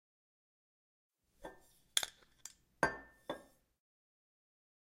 42/5000
Sound that makes a bottle when it opens